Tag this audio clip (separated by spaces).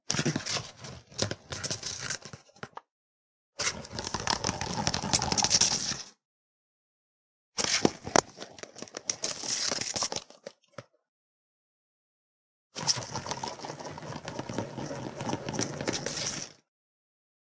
item plastic rolling